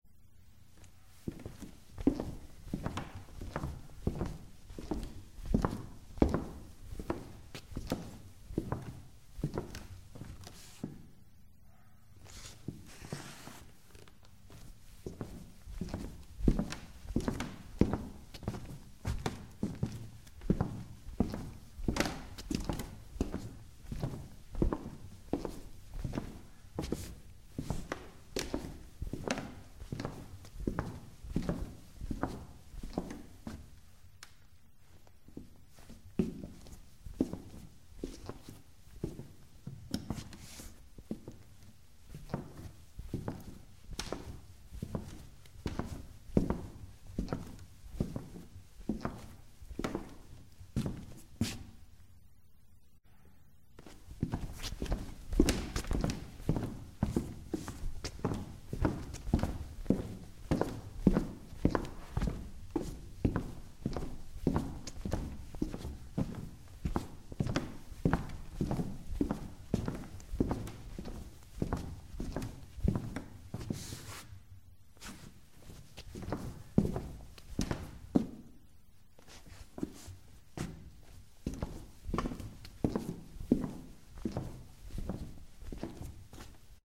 Pasos en loseta T1
pasos en loseta interior. footsteps in tile interior
footsetps, interior, tile, pasos, loseta